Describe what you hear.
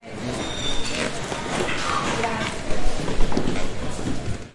01TOMA 5 Pasaje Hernández PASOS piso madera Jorge Díaz
Sonido capturado para el proyecto SIAS-UAN con el semillero de la Maestría en Arte Sonoro UAN, como parte del trabajo de patrimonio sonoro. Este sonido se capturó con una zoom H6. Trabajo realizado en mayo 2019